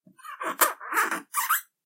Synthetic Noise - 24
Made using a ridged piece of plastic.
digital, robot, animal, strange, electric, synthetic, machine, sci-fi, metal, industrial, electronic, zip, synth, effect